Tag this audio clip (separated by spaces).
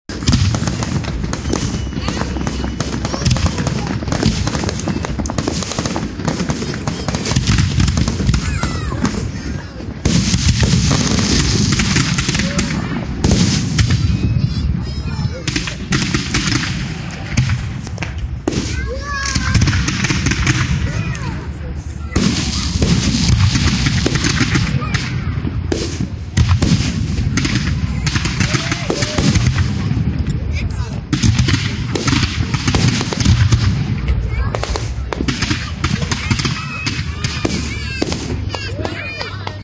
ambience celebration crowd field-recording fireworks Kansas outdoors people sfx stereo Wichita